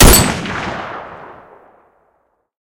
M1 Garand Rifle
Here's an M1 Garand. Enjoy!
Rifle, Battle, M1, Garand, Firearm, Gun, WW2, Combat, Weapons